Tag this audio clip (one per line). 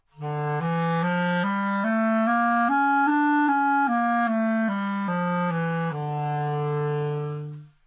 scale
good-sounds
neumann-U87